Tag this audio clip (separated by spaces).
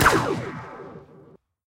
gun blaster sci-fi weapon Star-wars laser